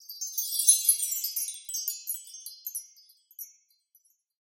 Wind Chime Monte 00
Wind chime recorded with a matched stereo pair in X-Y config.
Cheers Monte
Chimes, Chime, Wind, Studio-Recording